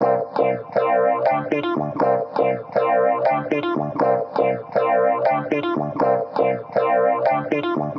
free music made only from my samples
This sound can be combined with other sounds in the pack. Otherwise, it is well usable up to 60 bpm.